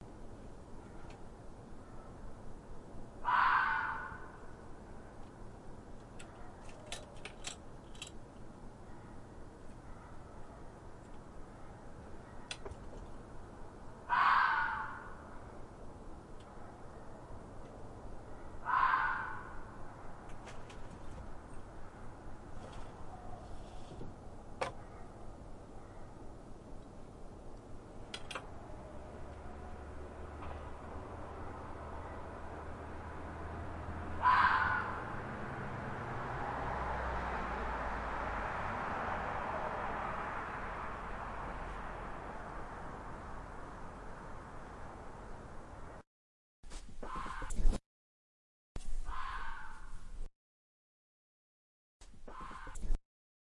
Fox scream
Heard this out my window at 11:30 at night, 05/14/20, Central New Jersey. Probably a fox.